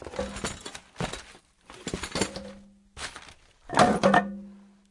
Rummaging through objects
random, rumble, objects, clatter, rummage